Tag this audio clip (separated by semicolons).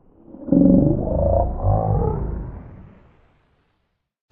slow; horror; beast; growl; monster; scary; creature; roar; deep; growling; bass